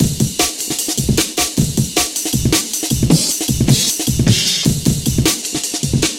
just another jungle drum beat....twisted chopped bounced cut
amen, bass, beat, brother, chopped, cut, drum, drums, jungle, mash, up, winstons